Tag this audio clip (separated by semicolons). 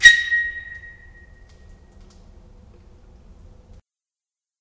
loud; male; reverb; tunnel; whistle